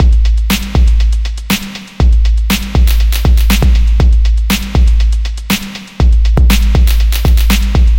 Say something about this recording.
Download and loop. Free breakbeat made in Jeskola Buzz using onboard 808 generator and customized effects.
120, 120-bpm, 120bpm, 808, bass, boom, bpm, breakbeat, Buzz, club, dance, funk, funky, groove, hard, hit, house, industrial, Jeskola, phat, sub, underground